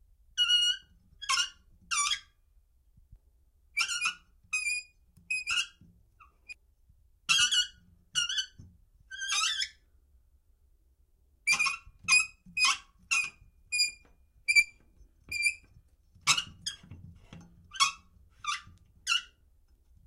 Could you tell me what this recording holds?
squeaky valve 2
a shower valve turned on - water turned off. It made a nice squeak.
SonyMD (MZ-N707)
valve, plumbing, squeak